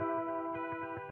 electric guitar certainly not the best sample, by can save your life.
arpeggio, electric, guitar, spread